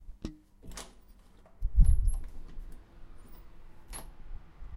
Opening the door